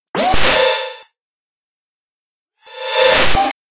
Sudden Teleportation
shot, sci-fi, teleportation, laser, disappear, effect, spaceship, scifi, space, digital, alien, teleport, signal